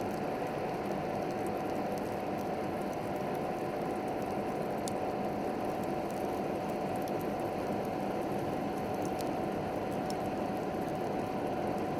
Forge - Coal burning with fan on short
Coal burning is a forge while the fan is on, short.
tools, coal, fireplace, crafts, 8bar, 80bpm, work, labor, furnace, field-recording, fan, blacksmith, forge, blower, metalwork